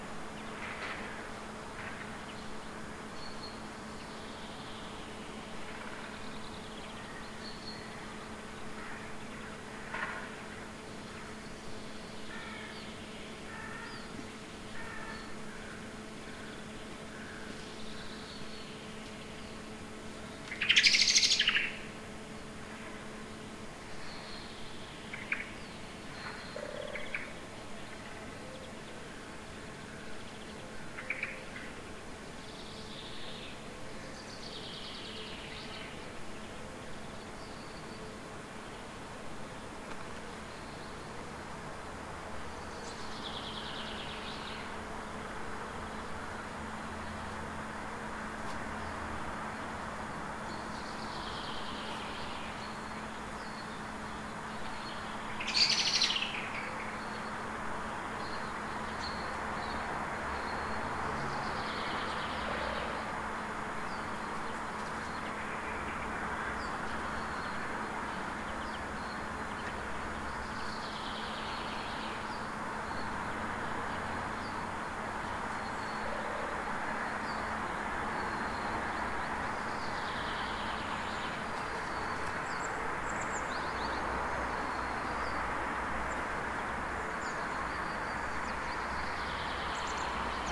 Recorded with Zoom H2 at 7:30 am. Near street-noice with several birds